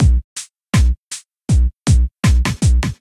A straight up ambient beat that can be used in chillbeat productions as well.